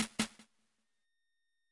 palillo de diente alienaacd2
experimental, percussion-hit